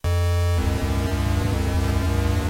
sample of gameboy with 32mb card and i kimu software